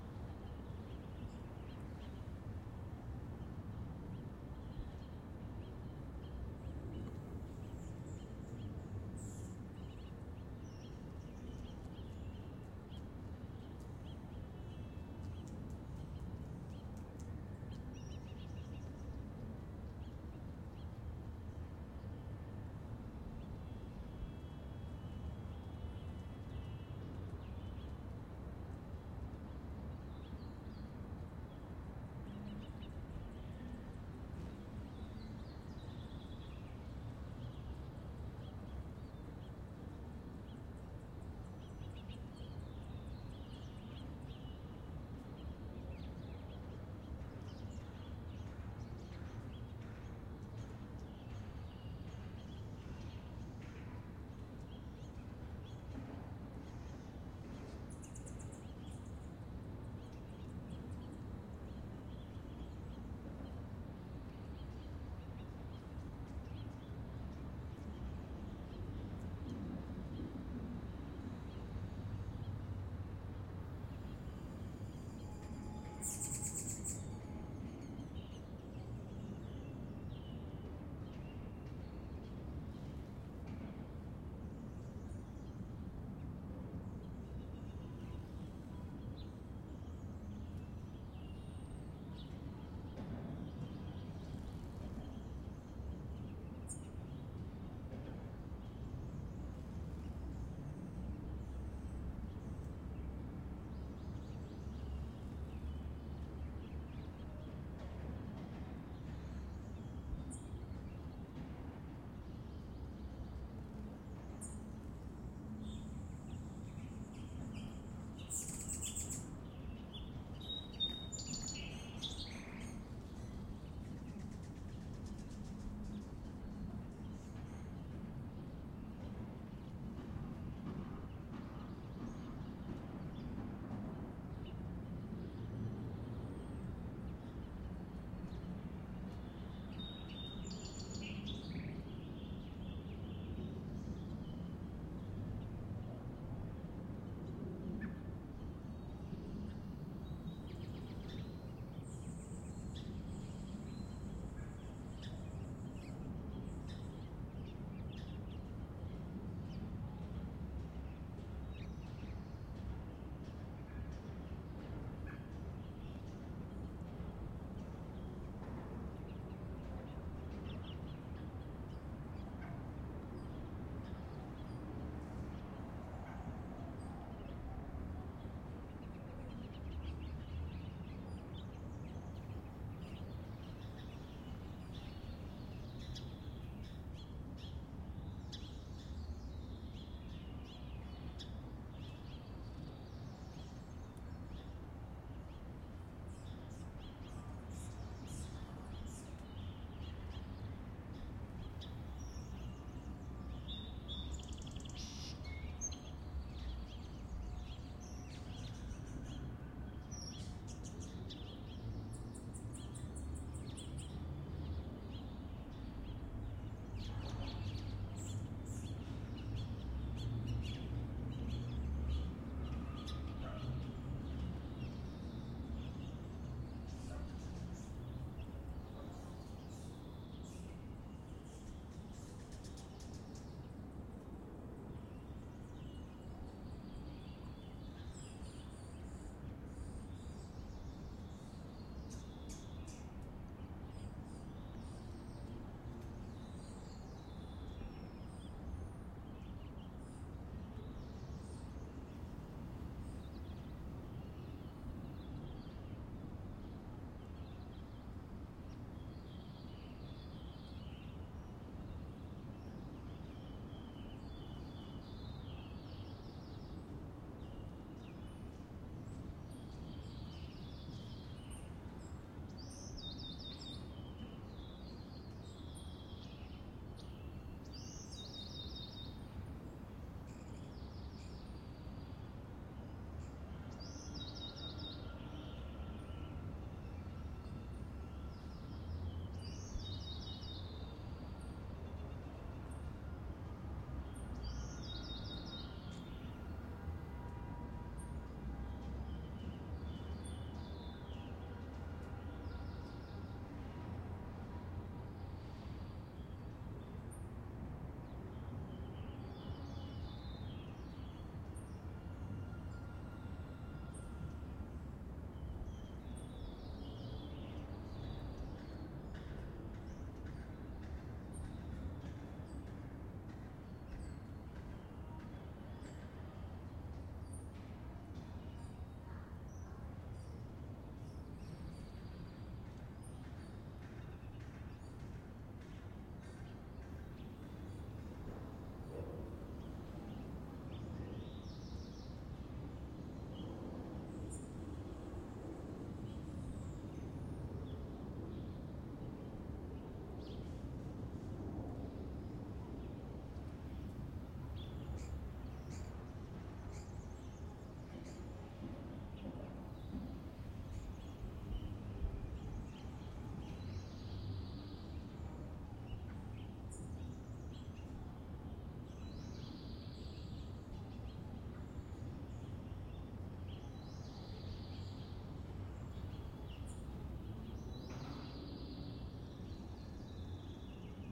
AMB Garden in the city
Recorded with a sound device and senheisser mics. A garden or a park in the morning with many birds and insects, traffic in the background, horns and a construction far away. México city.